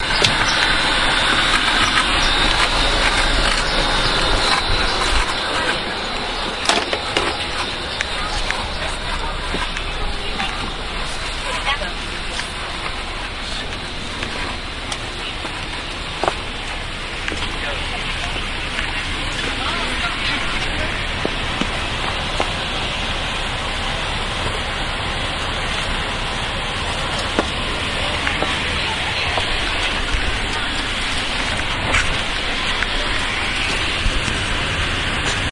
Field recording of walking into a bus in order to find a seat.
motor, field-recording, bus, inside, find-seat